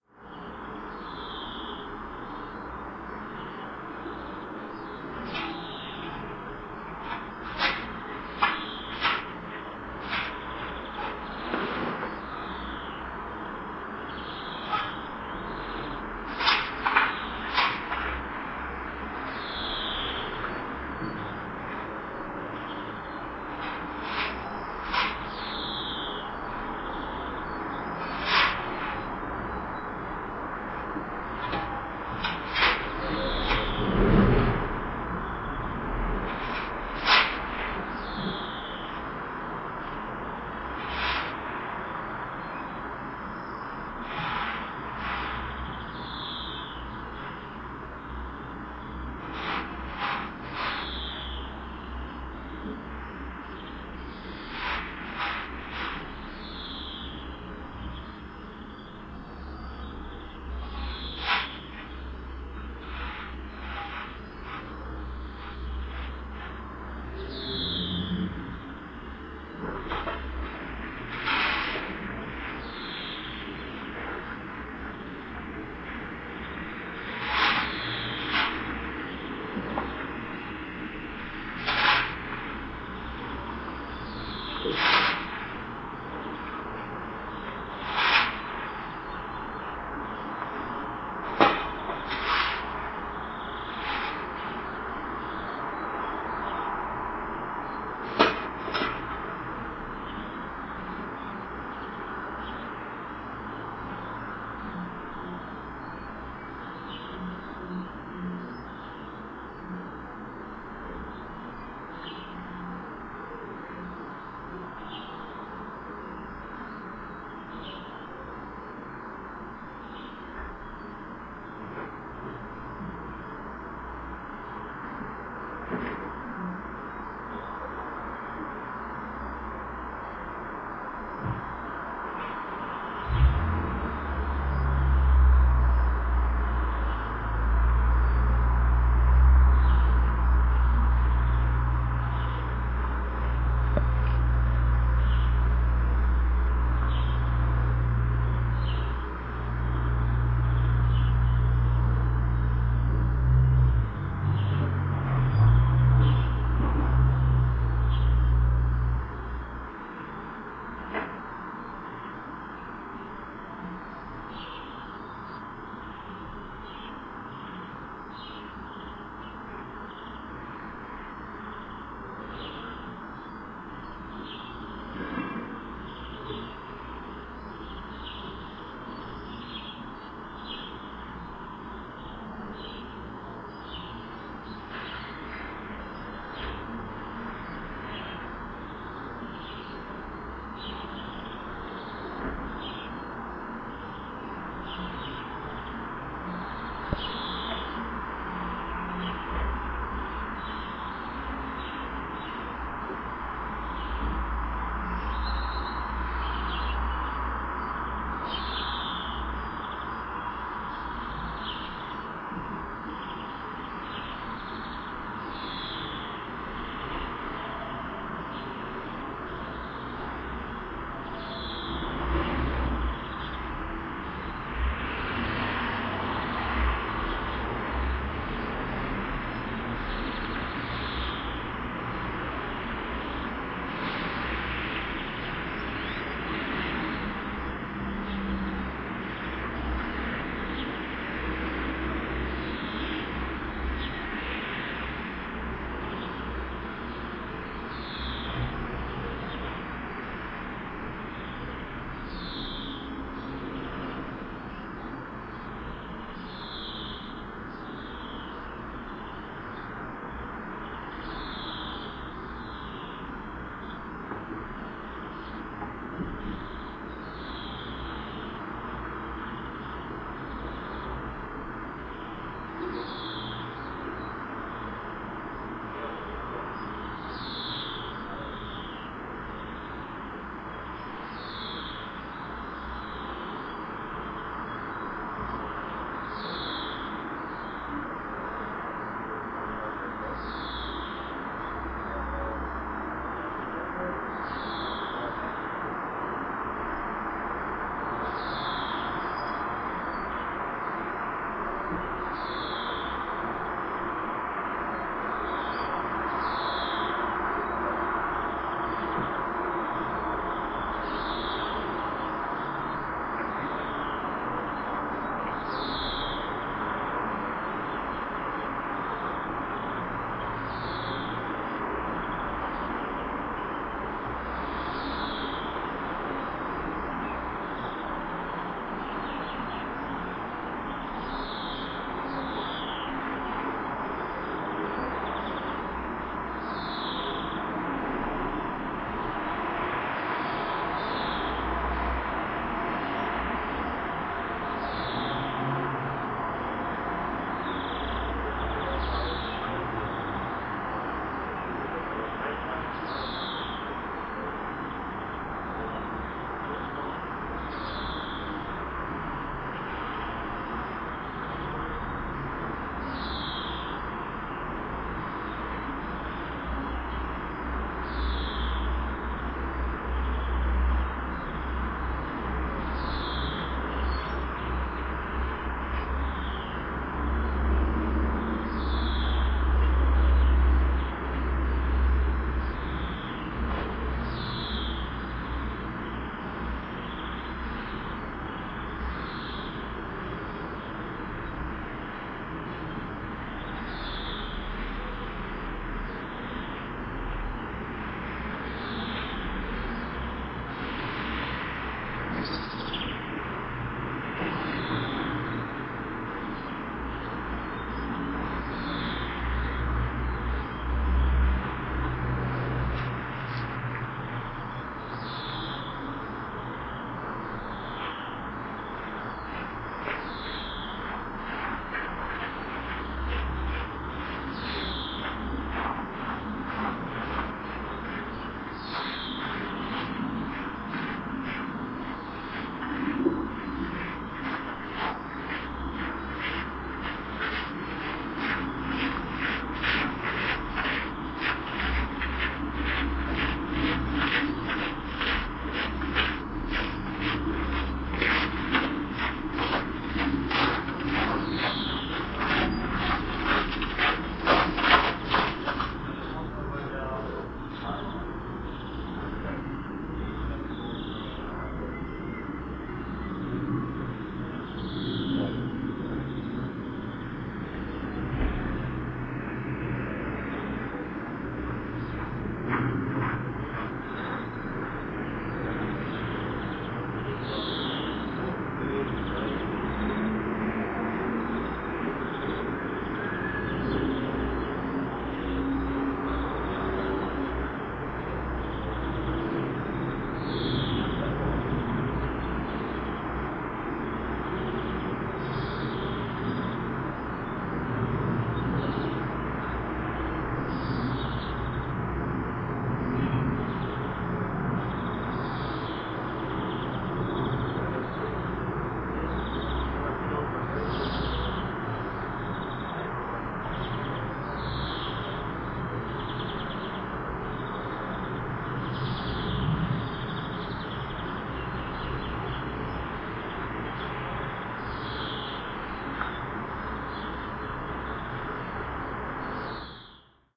winter snow frost